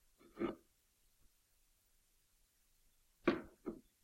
Container being picked up and put down.
pick-up, put-down